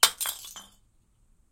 Bottle Smash FF176
1 high pitch, quiet short bottle smash, hammer, liquid
Bottle-smash, bottle-breaking, high-pitch